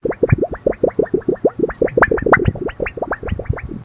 plastic wobble
I recorded this straight onto my Mac microphone. Its a round piece of plastic that i wobbled.
plastic, wobble